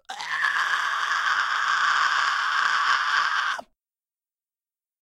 Pig Squealing recorded by Alex (another one)